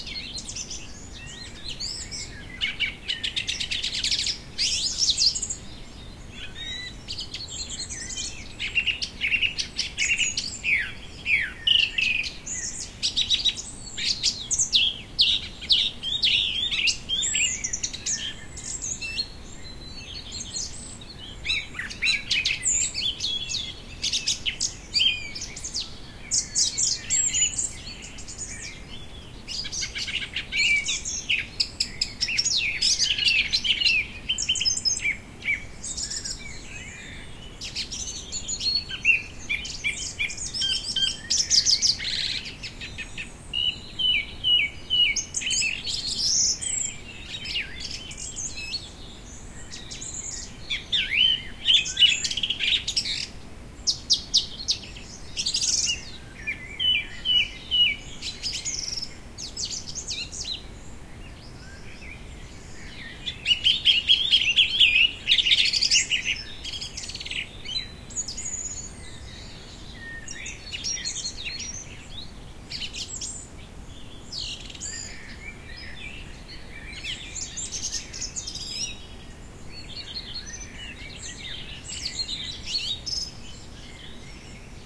140809 FrybgWb Vineyard Evening R
A summer evening in a vineyard by the German town of Freyburg on Unstrut.
The recording abounds with natural background noises (wind in trees, birds, insects) and with distant traffic noise from the town below.
The recorder is located at the top of the vineyard, facing across the valley below.
These are the REAR channels of a 4ch surround recording.
Recording conducted with a Zoom H2, mic's set to 120° dispersion.
vineyard, atmosphere, ambiance, Unstrut, field-recording, ambience, atmo, summer, birds, Freyburg, ambient, surround, nature, rural, 4ch